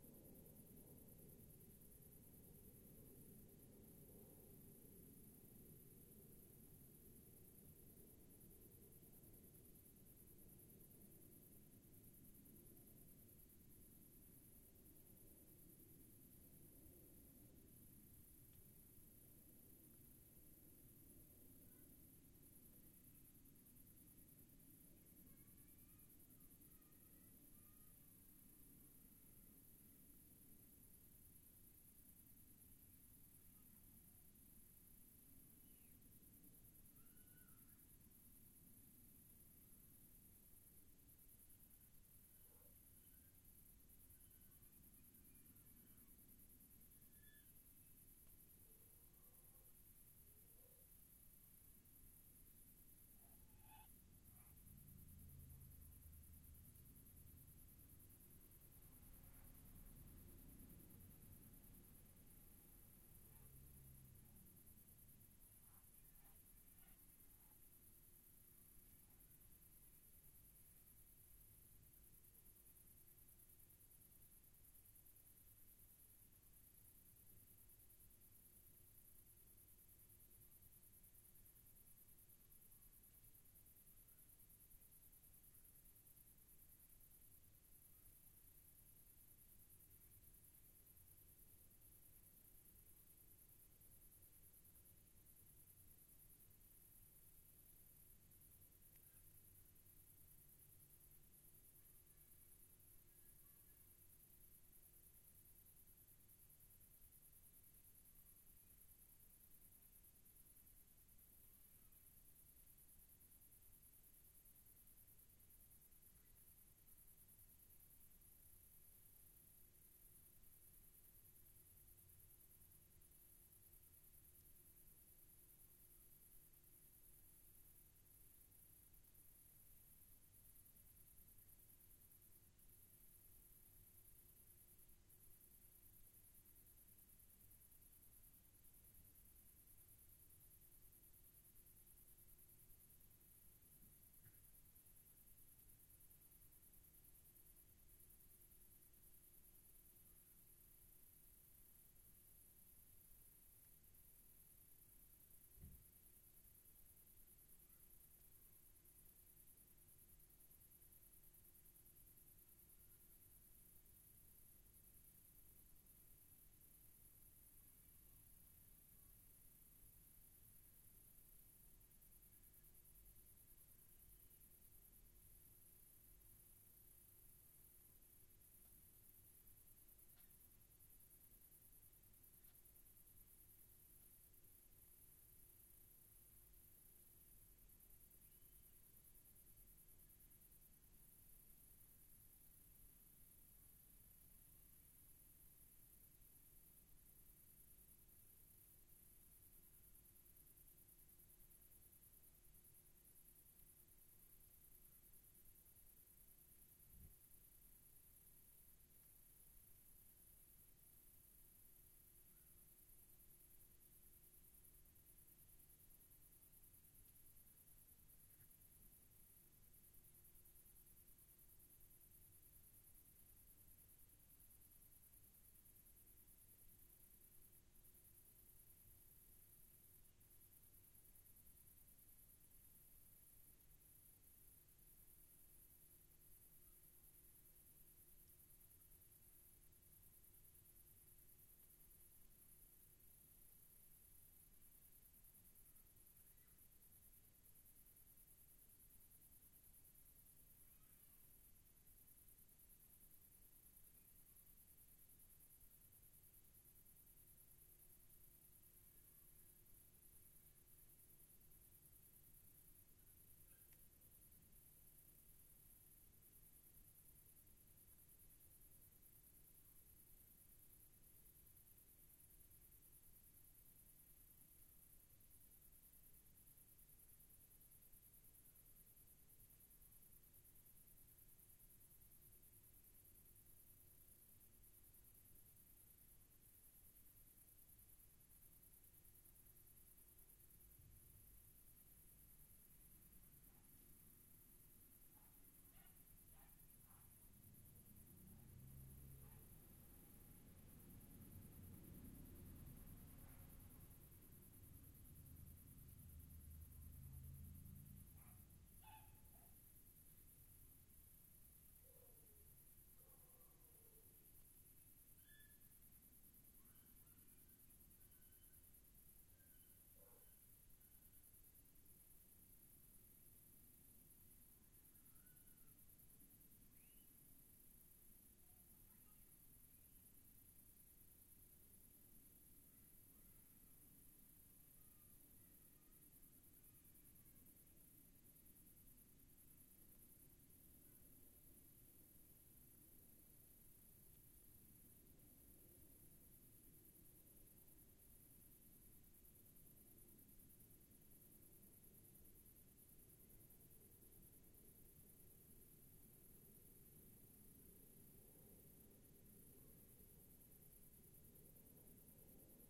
ZOOM0005 TrLR EDITED
A recording of ambient noises in the night, recorded with a Zoom H5 Handy Recorder. The left and right channels have been EQ'd differently to give a wider stereo feel, and a reversed version of the recording has been layered on top of the original.
Environment, Night, Amb